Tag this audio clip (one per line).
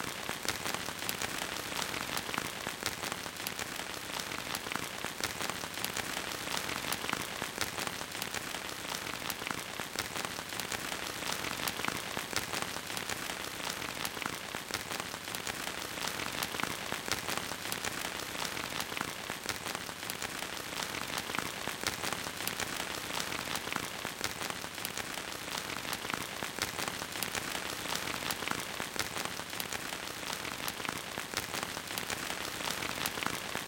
atmoshpere
fx
rain
sounddesign
soundeffect
stereo
texture
water
weather